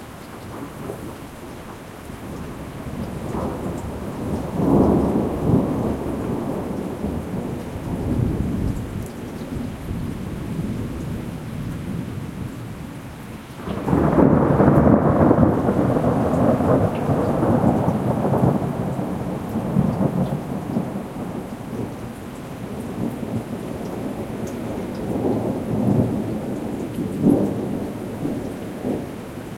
Thunder with rain
Two single thunder sounds (one soft and one louder) with rain in background, on a summer night. As I recorded it on my balcony, you can hear the gutter drip too.
Recorded with a Tascam DR-05. No post-process.
balcony, drip, gutter, lightning, nature, night, rain, storm, summer, thunder, thunder-storm, thunderstorm, weather